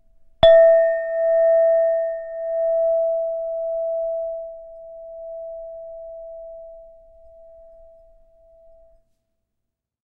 DS.Clink.09.3
Hit that lid!!
chime, clink, hit, lid, metal, reverb, sound